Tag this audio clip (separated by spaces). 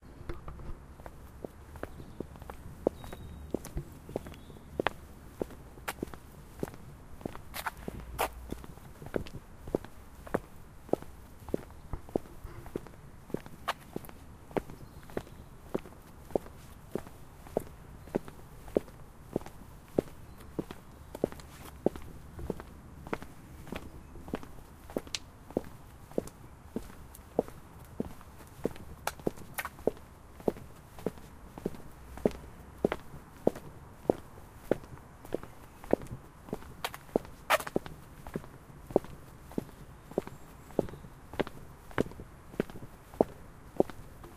2-persons-walking 2-persons-walking-on-a-hard-ground 2-persons-walking-on-stonefloor feet floor foot footsteps pavement step steps walk walking walking-on-pavement walking-on-stonefloor